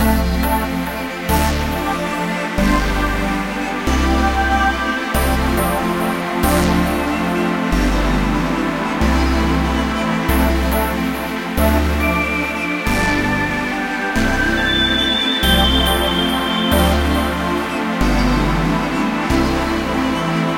MF "The Circus"
Melodic Synth for EDM. Made with FL Studio.
Dance, EDM, Electro, House, Loop, synth, trance